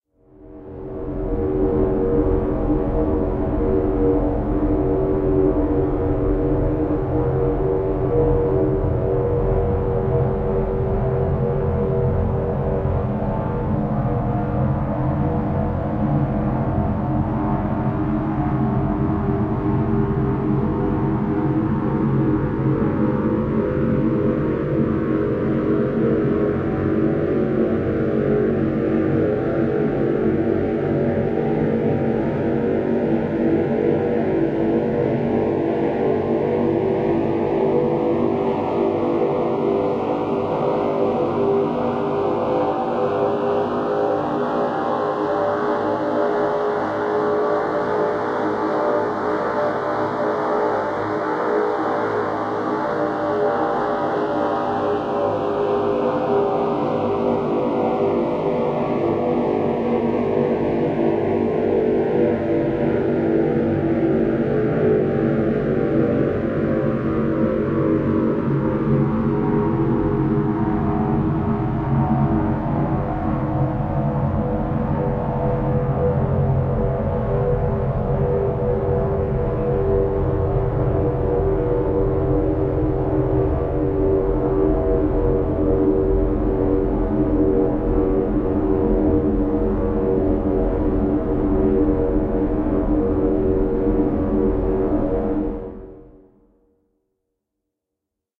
EngineRoomPipeNoise3 RiseFall Env
Steady for a while at start, middle, and end, otherwise experience a rise and fall between those, both in the tonal structure and in the filter structure. The settings are completely different from the other sounds in this series. It's low-pass filtered noise with multiple complex delays with feedback that have a harsh but stereo-correlated effect forming a soundscape with the impression of metal, pipes (large tubes), and perhaps the engines of some fictional vessel. Created with an AnalogBox circuit (AnalogBox 2.41alpha) that I put together.
abox, vessel, synthetic, tone-sweep, filter-sweep, engine, ambient, pipe, falling, tube, background, metal, rising, noise